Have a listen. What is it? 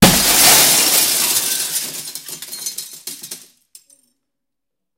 Windows being broken with various objects. Also includes scratching.